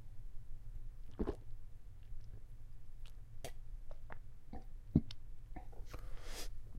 Taking a sip 2
Recording 2 of me taking a sip of a drink. Yum.
liquid, male, water, Sip, drink, soda, human, slurp